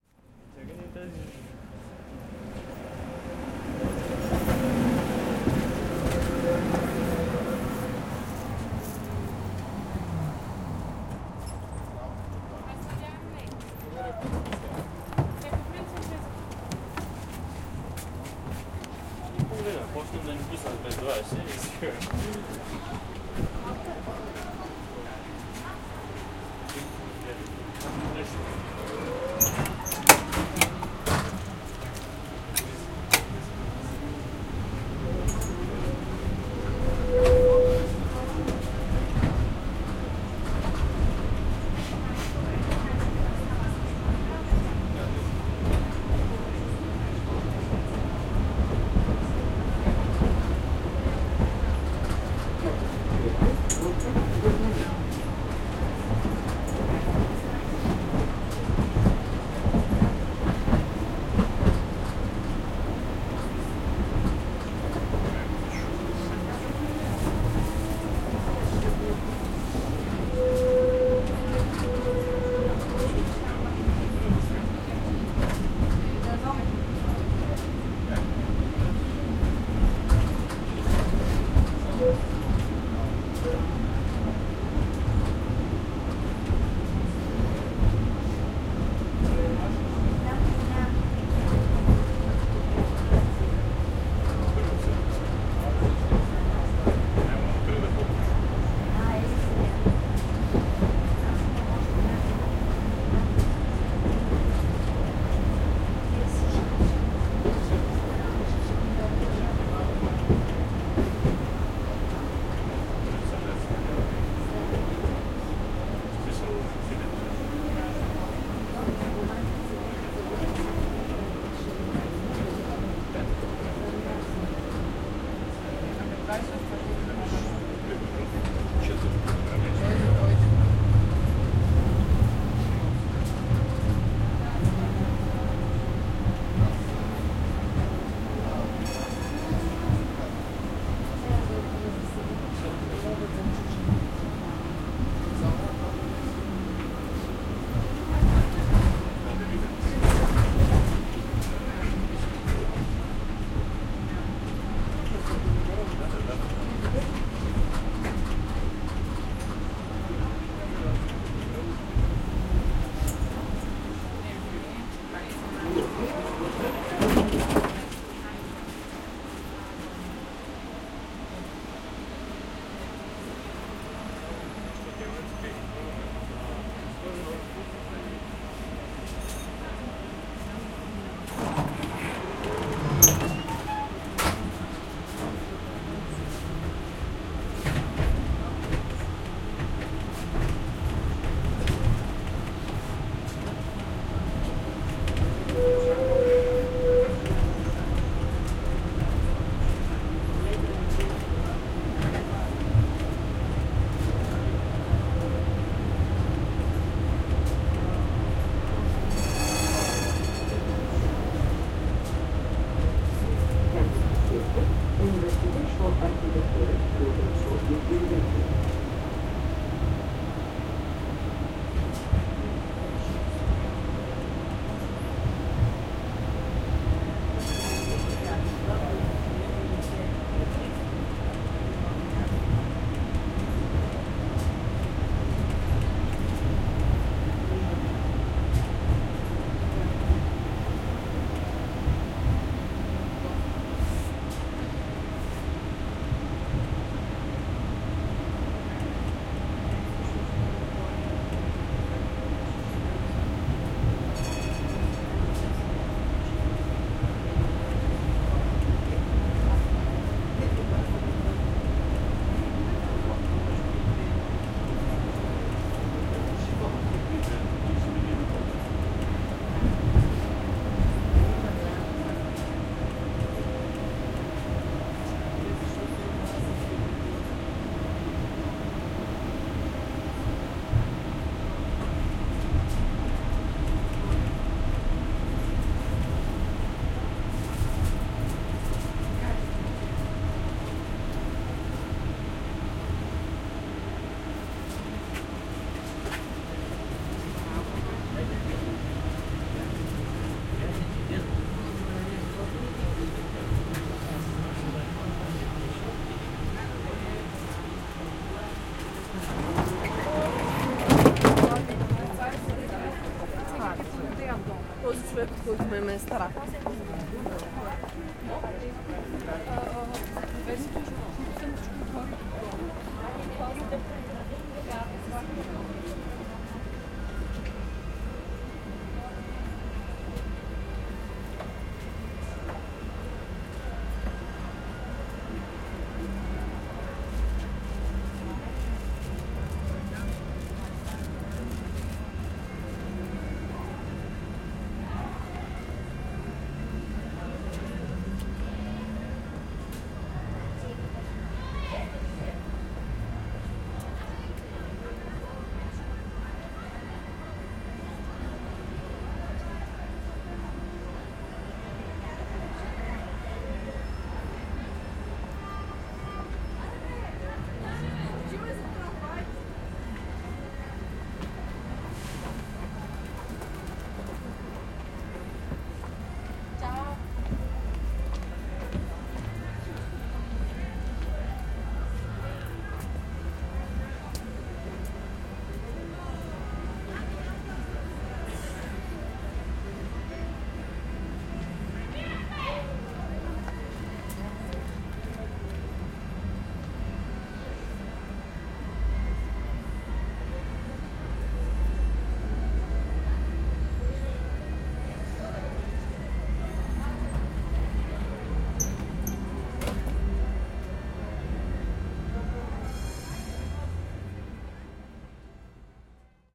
Tram indoor
indoor, rail, transport, travel